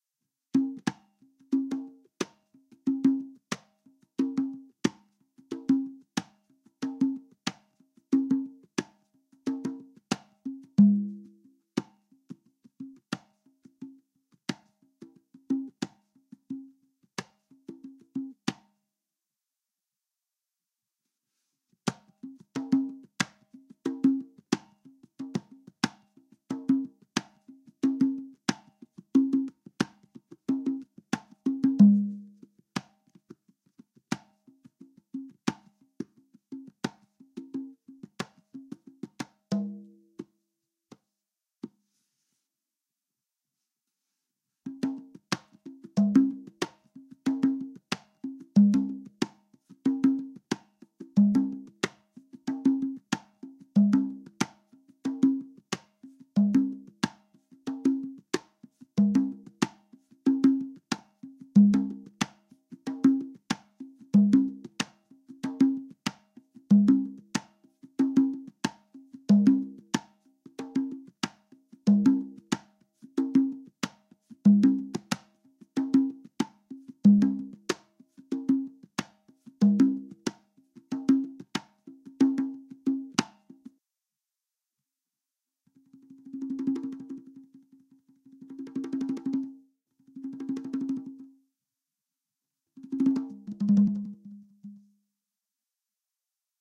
Congas simple groove 1
Some bars of conga beats, stereo separated conga and quinto, close miked. A few rolls also.
pop, beat, conga, congas, salsa